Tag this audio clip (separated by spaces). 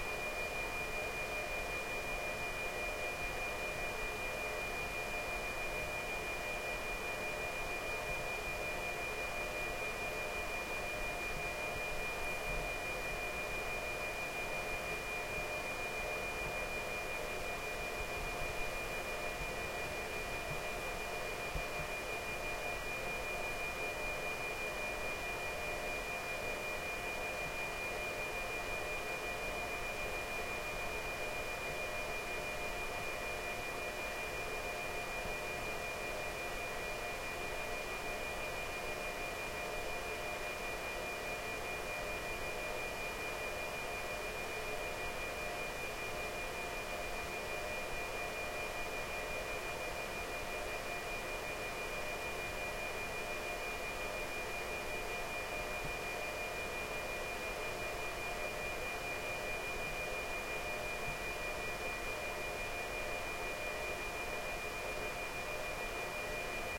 hallway ambience Kabelv field-recording Norge server junction g school industrial box fuse-box Lofoten atmosphere fuse Norway amb noise junction-box